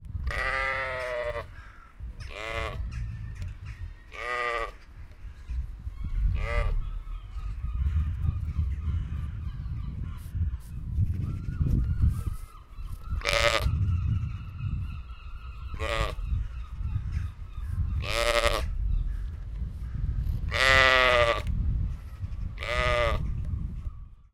sheep calling her baby lambs
Sheep calling her three baby lambs. Recorded with a zoom H1n in a City Farm in The Hague.
Morning, 12-03-15.
lamb, farm, sheep, Netherlands